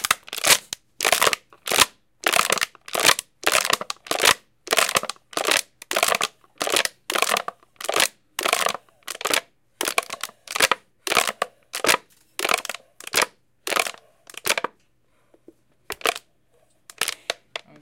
Mysound-IDES-FR-plastic bottle1
Deep breathing in and out
plastic, IDES, school, paris